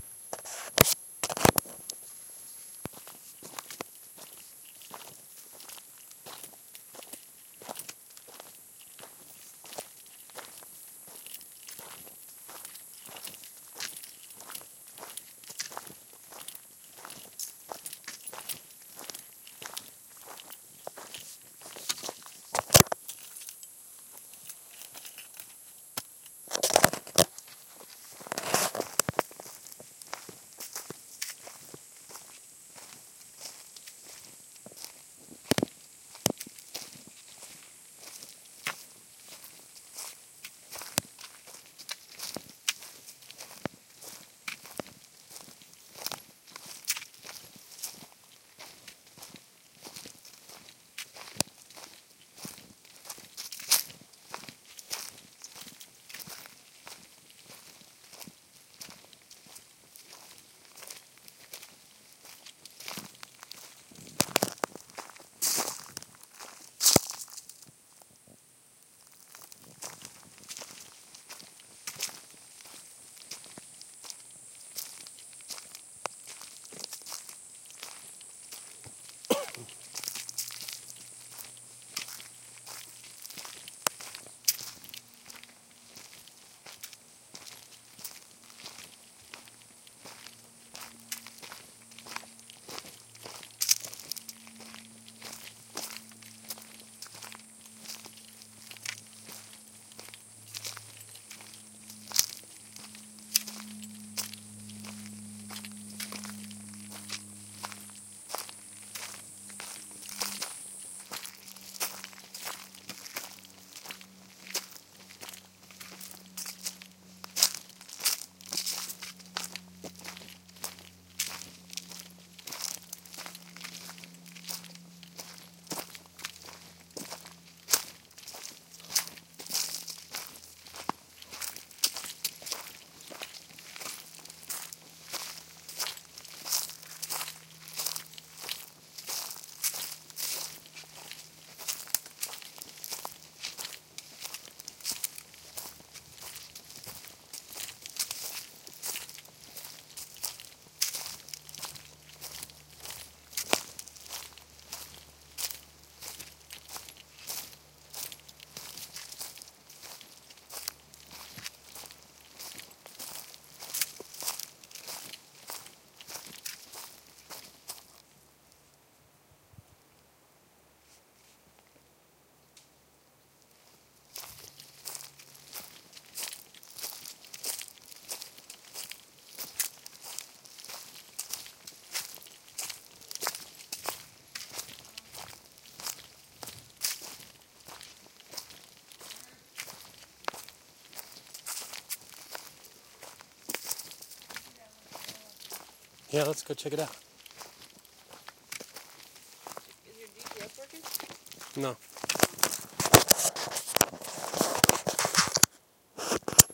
forest-walk-crickets
man walking in forest ambiance english talking crickets
ambiance, crickets, forest, man, walking